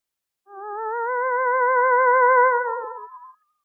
Improved version of this sound. I got rid of a static frequency at around 360hz.
Original description from AntumDeluge - "I created this moaning sound using reaktor and applied effects in logic. It was used as part of the sound design for a Haunted House."